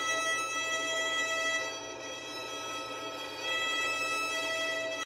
poppy E 4 pp sul-pont
recordings of a violin (performed by Poppy Crum) playing long sustained notes in various expressions; pitch, dynamics and express (normal, harmonic, sul tasto, sul pont) are in file name. Recordings made with a pair of Neumann mics
high, squeak, violin, shrill, note, pitched, sustain, long